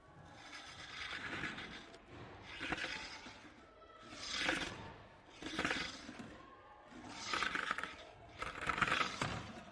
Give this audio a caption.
Skateboard Metal Grinding.2
recording, One, sampling, alive